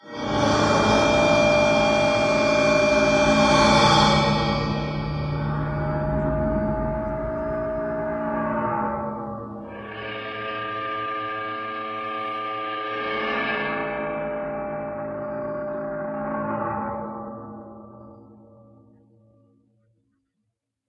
cymbals processed samples remix